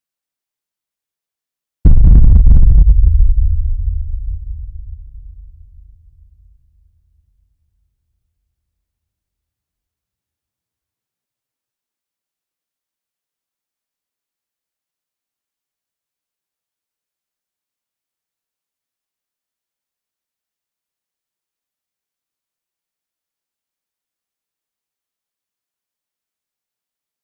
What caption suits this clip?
Low Harmonics Boom
low frequency boom sound, great to hear on subwoofer!
boom, frequency, great, harmonics, hear, low, rummble, sound, subwoofer